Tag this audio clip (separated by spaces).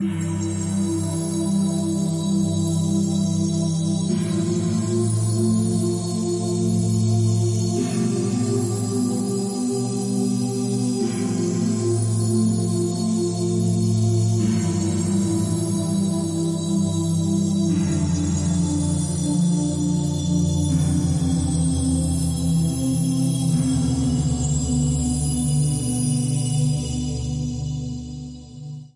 Pads Live Krystal Cosmic